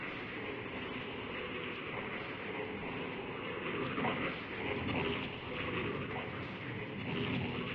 An army of tripped out monks mumbling to their own secret pattern. Should loop ok. Part of my Strange and Sci-fi pack which aims to provide sounds for use as backgrounds to music, film, animation, or even games.
monks, church, synth, chant, atmosphere, music, electronic, ambience, religion, noise, loop, buddhist, processed, voice